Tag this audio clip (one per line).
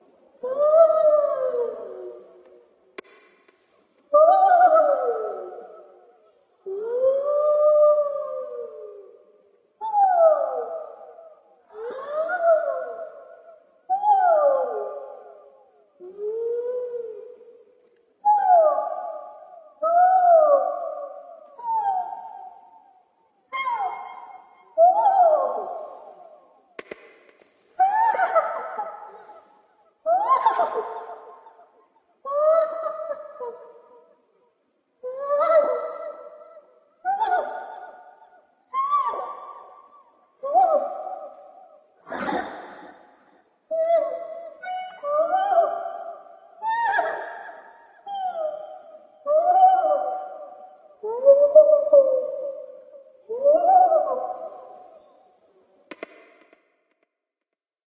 scream
funny
ghost
scary
souls